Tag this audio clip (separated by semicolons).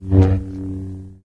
lightsaber; star; wars